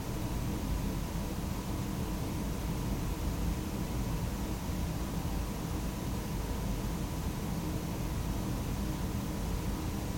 Ambient Tone
Ambient room or building tone.
ambiance, ambience, ambient, atmo, atmos, atmosphere, background, background-sound, general-noise, hum, indoors, noise, room, room-noise, tone, white-noise